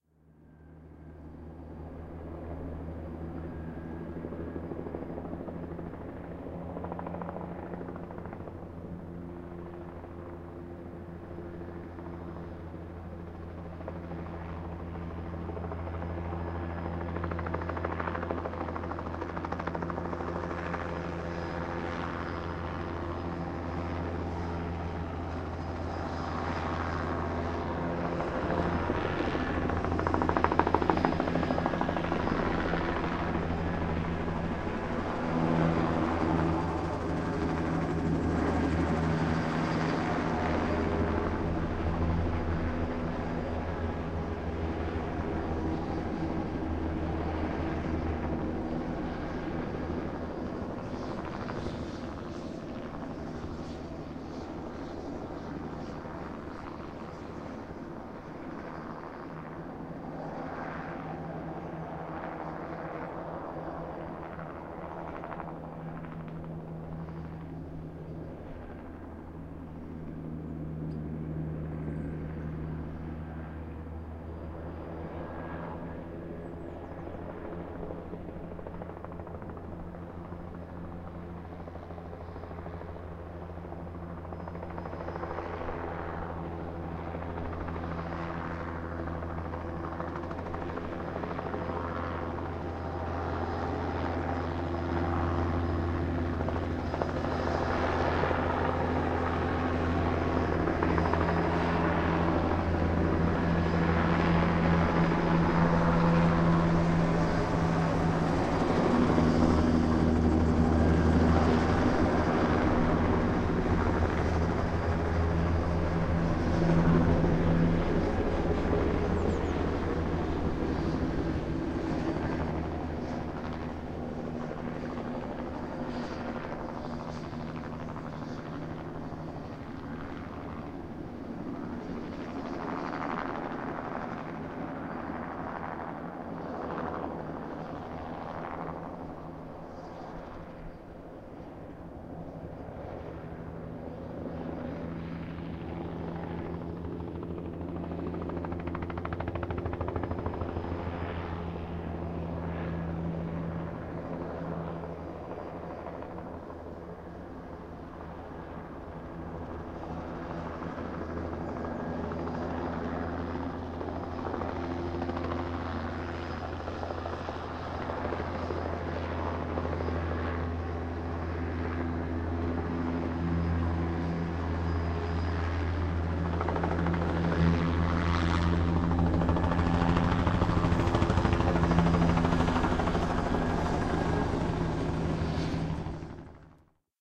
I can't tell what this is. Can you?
Helicopter Spraying Herbicide
Stereo field recording of a helicopter spraying bracken on a hillside. It does several short passes as if on a search pattern.Zoom H2>Rear Mics&Dead Kitten
spraying, field-recording, search-pattern, xy, stereo, helicopter